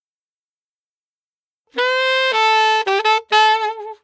Another lick in concert Am @ 105 bpm
alto, lick